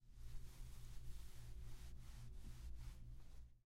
0043-Rose Tela
cloth movements with contact
movements, hug, cloth, contact